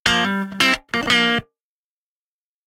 Funky Electric Guitar Sample 1 - 90 BPM
Recorded with Gibson Les Paul using P90 pickups into Ableton with minor processing.
electric, funk, guitar, rock, sample